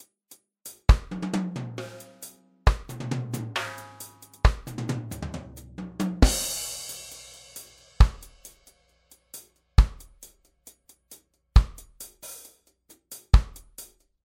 Reggae drum loops